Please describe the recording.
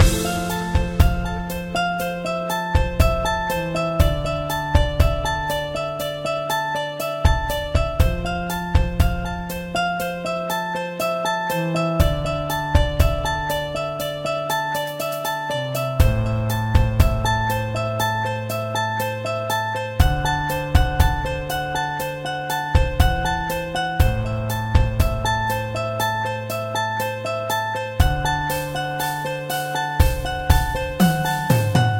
Loop StrongerAlone 02
A music loop to be used in storydriven and reflective games with puzzle and philosophical elements.